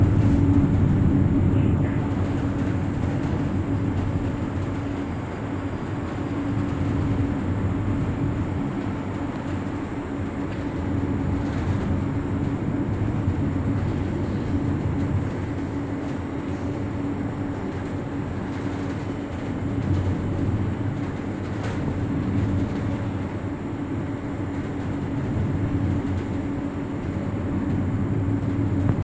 Recording of a noisy ventilation/air conditioning system in an underground station exterior